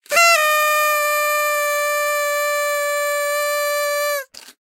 Party Pack, Horn Coil 01, Long, 01
Blowing a party horn with a plastic folding coil for a long time. A sound from one of my recent SFX libraries, "Party Pack".
An example of how you might credit is by putting this in the description/credits:
And for more awesome sounds, do please check out the full library or my SFX store.
The sound was recorded using a "Zoom H6 (XY) recorder" and "Rode NTG2" microphone on 7th June 2019.
trumpet, coil, blower, plastic, pack, horns, party, horn